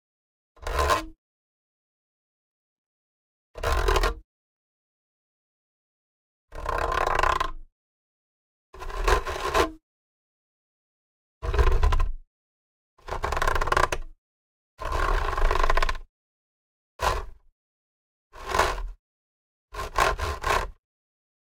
Heater grill scratches
This is a recording of the grill of a small blow heater, with 9 different hits, scratches and scrapes.
The sounds may be useful for metalic scrapes, monster vocalisations, sawing sounds, your imagination is the limit.
Edited in Adobe Audition, with noise reduction, and a noise gate.
machinery, office, mesh, growl, ZoomH4n, metal, H4n, steel